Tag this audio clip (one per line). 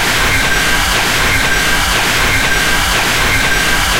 factory
industrial
loop
machine
machinery
mechanical
noise
robot
robotic